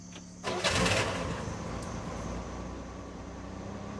Car Start Up
car starting up
car, start, starting, up